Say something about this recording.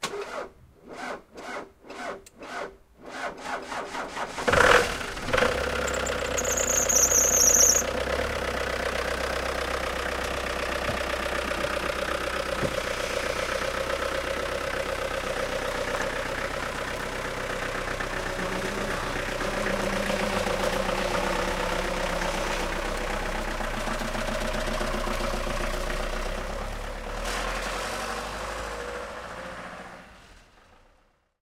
Cold start of old diesel car
Cold Startup of an old Ford Transit Diesel Van. The engine turns over very reluctantly and gives out it's telltale belt-screeching sound after startup before it drives off.
Recorded in PCM Stereo with a Zoom H1n field recorder.
motor, screeching, car, cold, van, dead, vroom, rattle, automobile, ford, belt, vehicle, diesel, battery, drive, engine, start, old, driving